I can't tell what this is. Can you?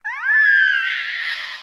A soul wrecking high pitched voice sound effect useful for visages, such as banshees and ghosts, or dinosaurs to make your game truly terrifying. This sound is useful if you want to make your audience unable to sleep for several days.
Dinosaur, RPG, Speak, Talk, Vocal, Voice, Voices, arcade, banchee, fantasy, game, gamedev, gamedeveloping, games, gaming, ghost, high-pitch, indiedev, indiegamedev, monster, scream, screech, sfx, videogame, videogames, witch